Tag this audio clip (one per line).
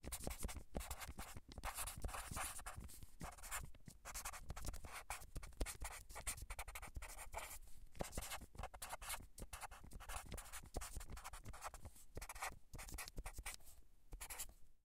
scribble
sharpie
scratching
write
paper
written
scratch
marker
writing
rustle